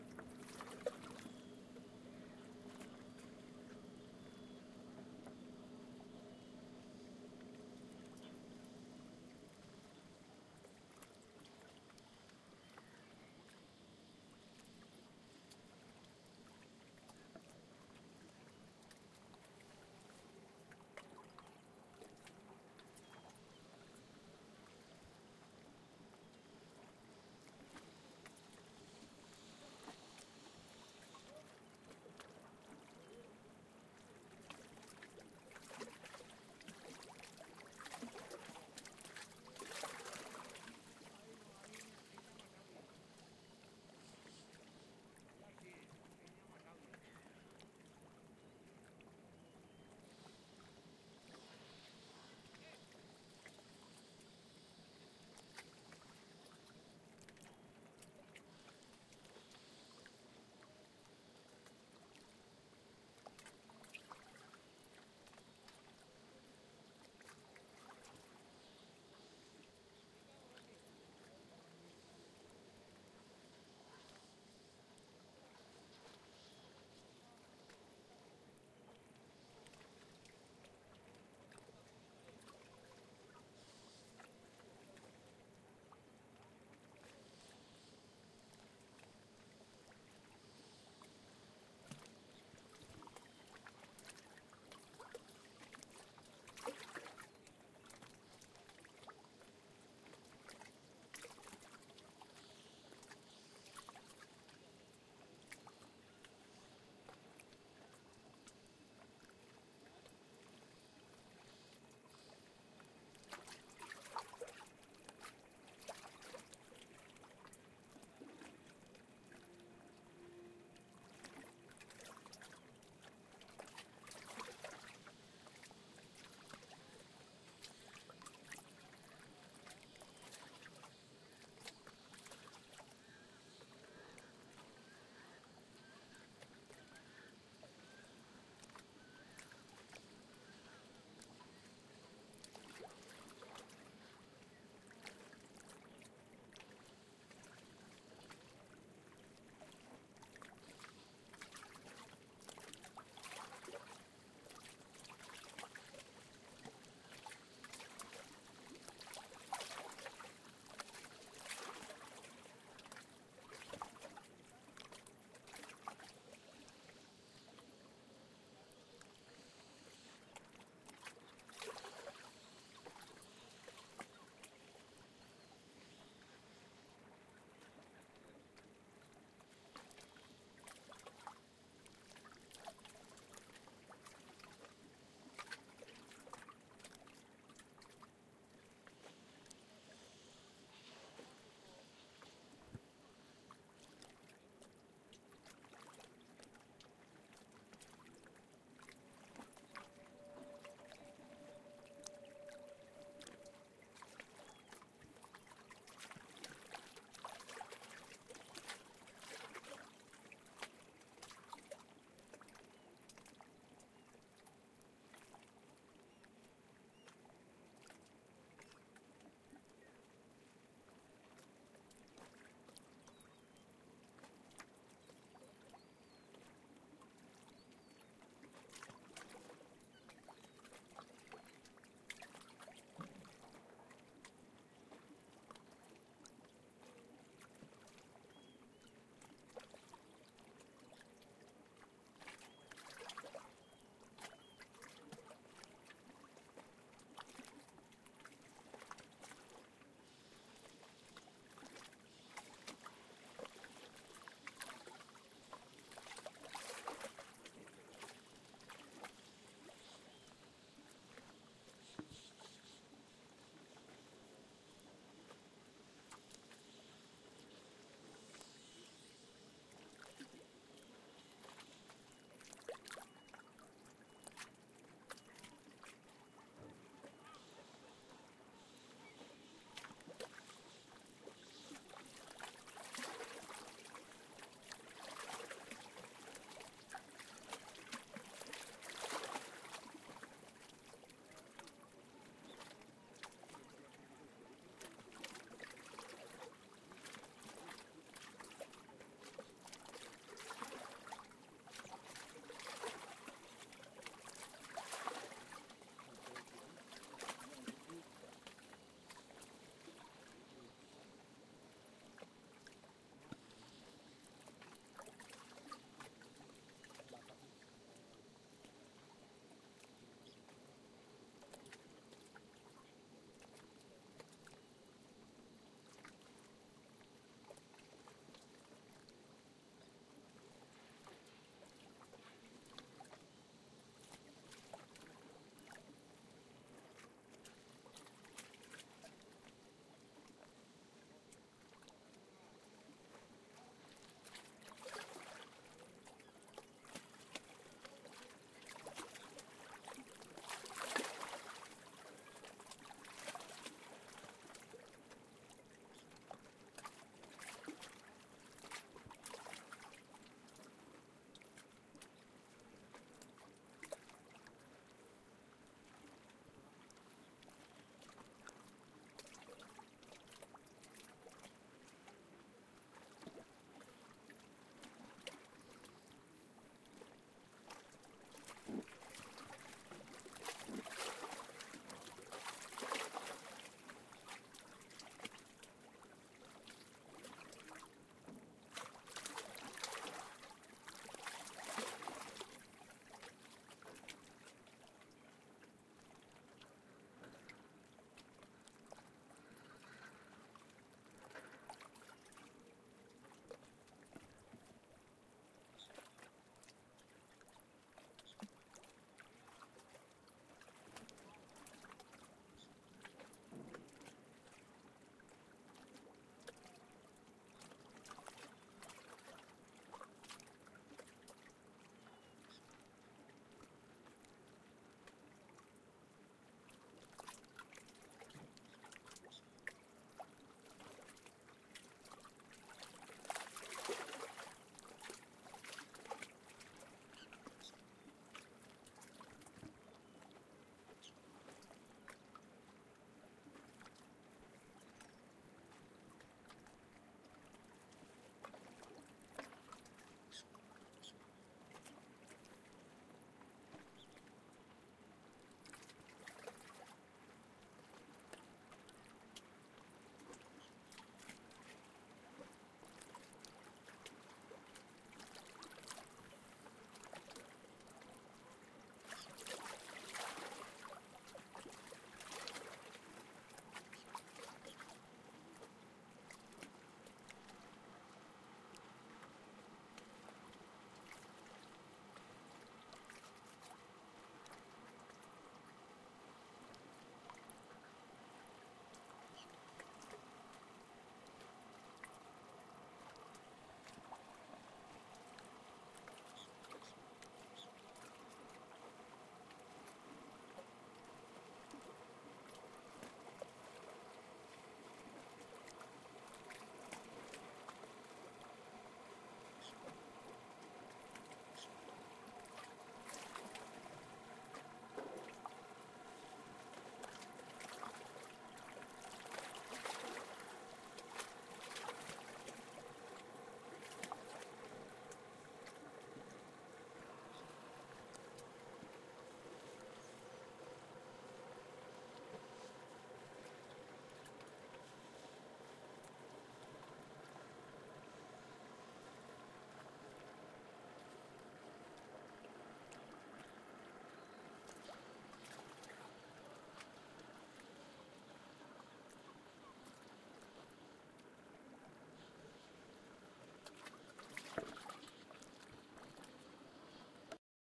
This sound was recorded on the breakwater of Gandia's Beach. We can hear the movement of the waves of the sea crashing with the rocks andsome motorboats, sailboats and boats.